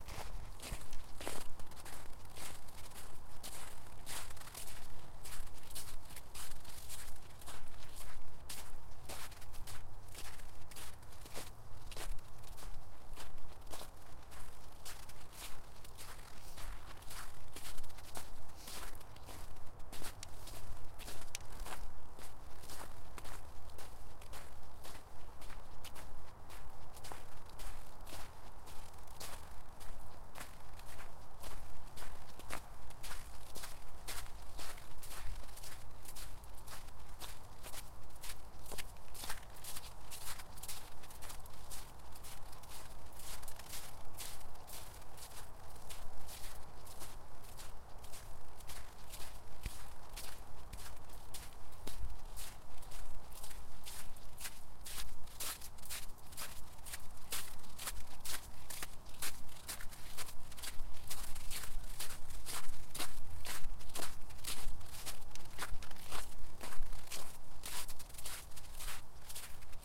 Snow footsteps close perspective
Walking through the snow in snowy Pittsburgh (January 2015). Close perspective. Light traffic in background, and a few sniffles (it was cold!). Recorded with Tascam DR-40, stereo.
footstep, walk, steps, step, slushy, walking, snow